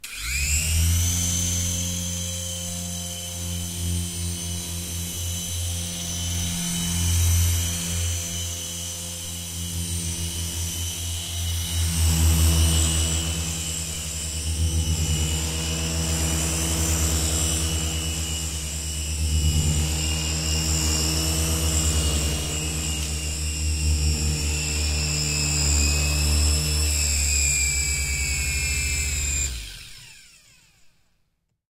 Toy Engine Flying Around
The engine rotor motor noise of a small RC helicopter. Flying around the front stereo recording microphone.
Recorded with Zoom H2. Edited with Audacity.
vehicle, flight, mechanic, flying, remote-controlled, mechanism, robotic, helicopter, mechanical, quadcopter, heli, hydraulic, engine, circling, motor, drone, play, cyborg, movement, robot, plaything, copter, stereo